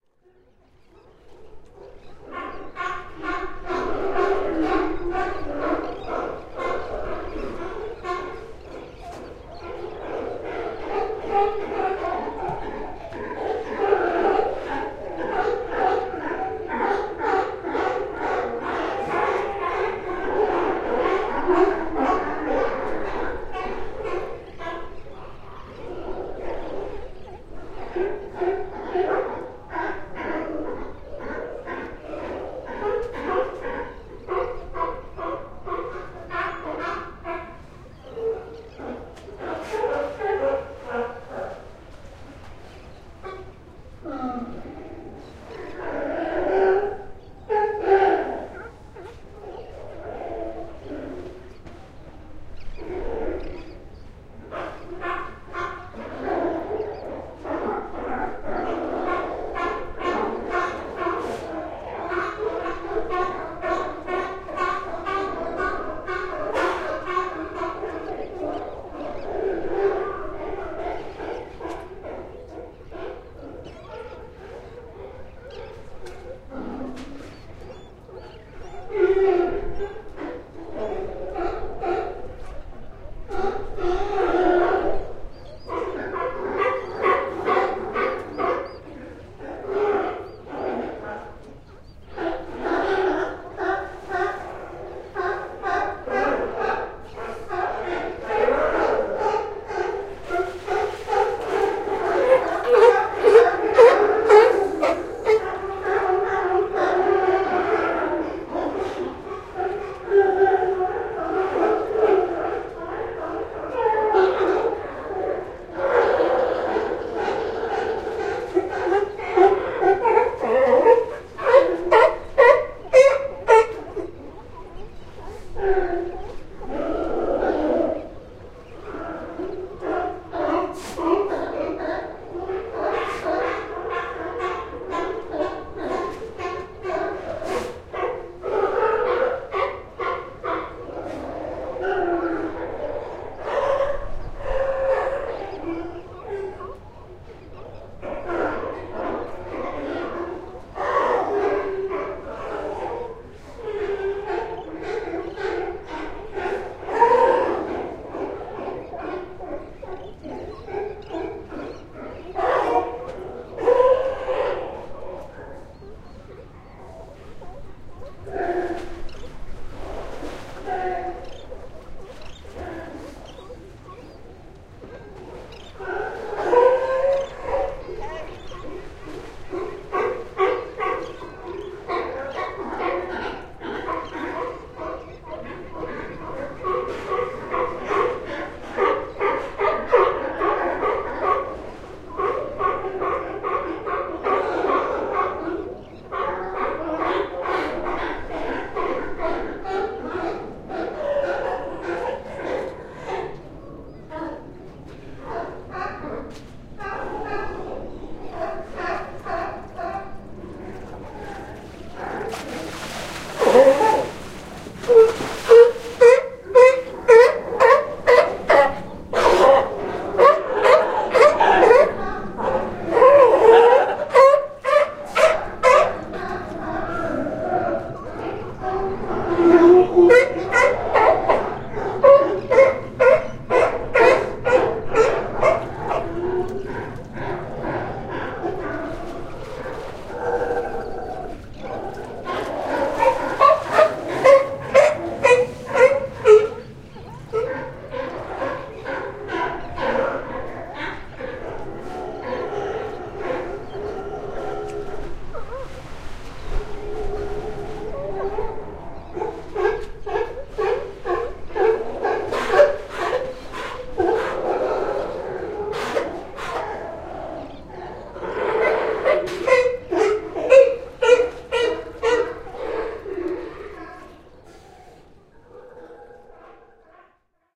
California Sea Lions, recorded municipal wharf 2, Monterey Bay, California